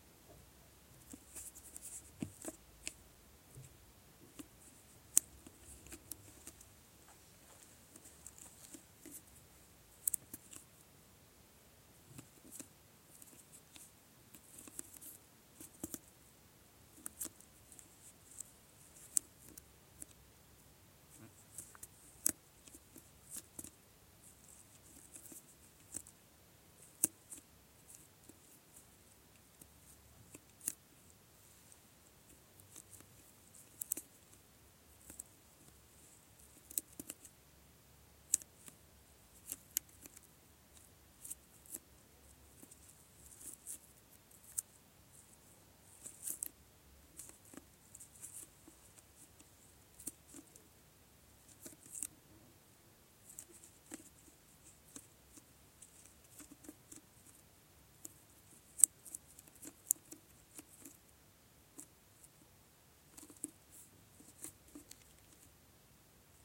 Handing a plastic bottle in a small room~